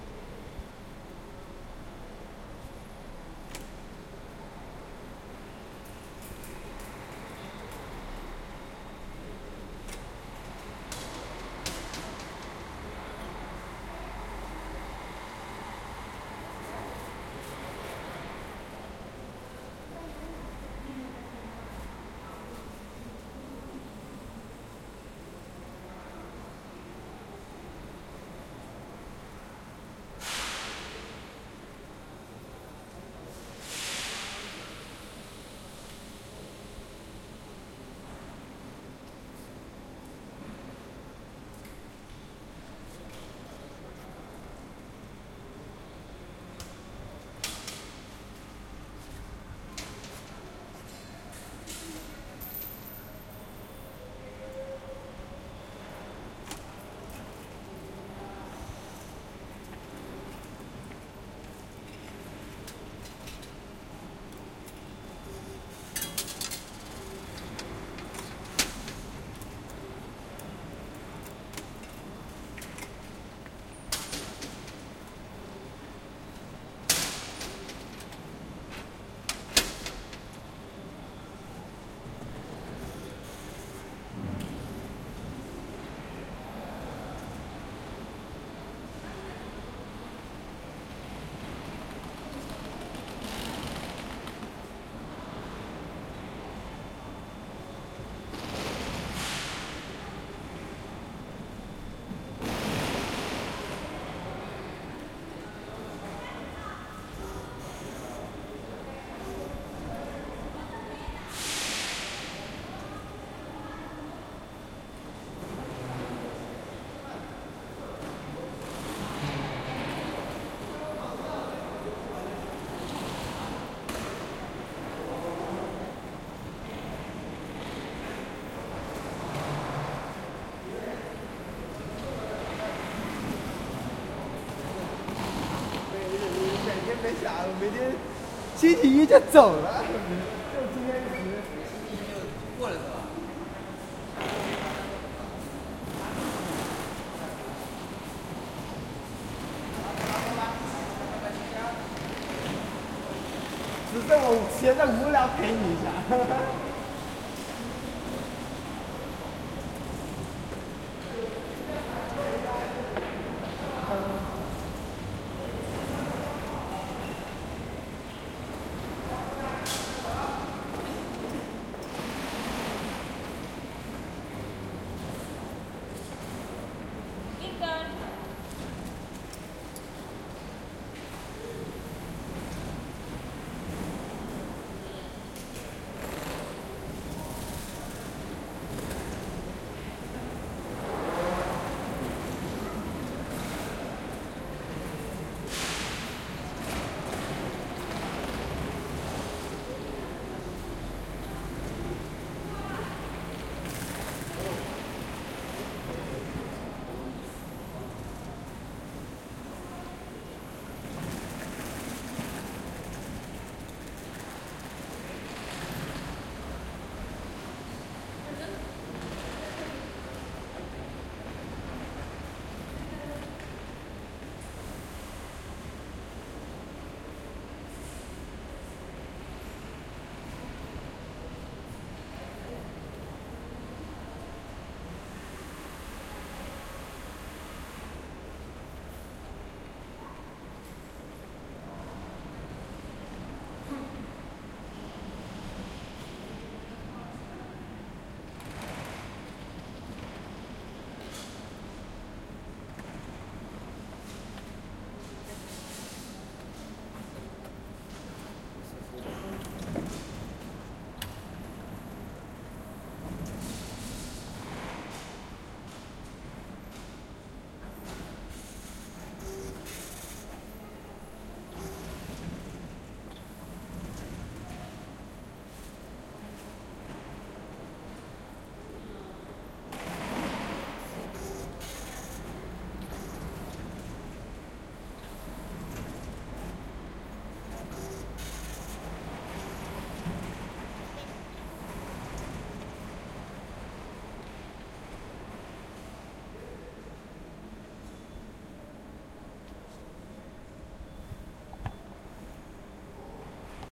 The following audios have been recorded at a bus and train station at Gandia (Valencia). They have been recorded late in the afternoon on the month of december.
movement, walking, coach, talking, travel, crowd, travelling, Valencia, Train, bus